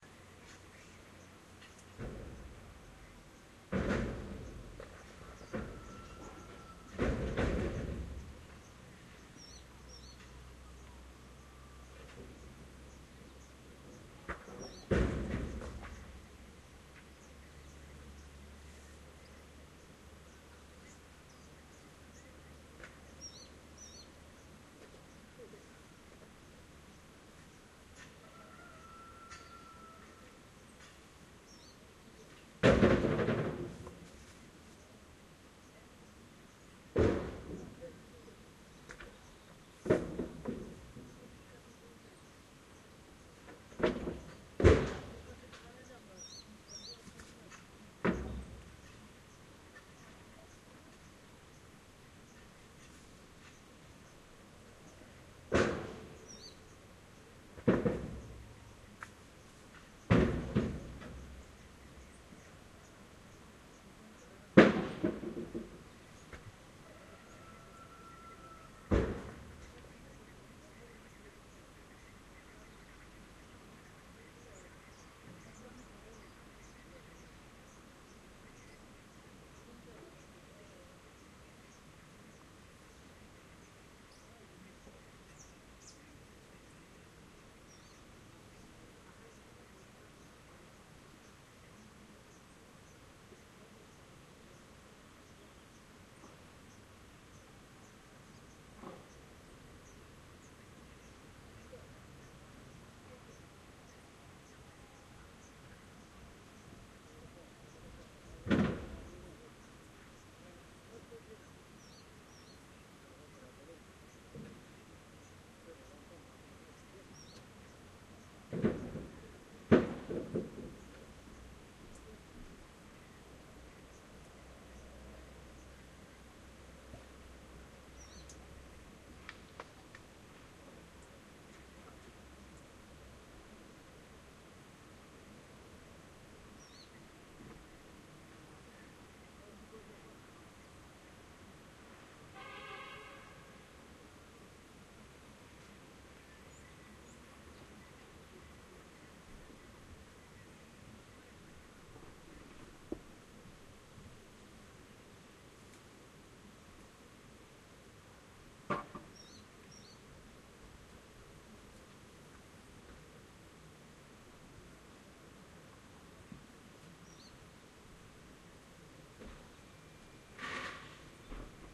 Taking apart a stone wall next door and loading it into a dump truck to be sold for building materials. Recorded on a Canon s21s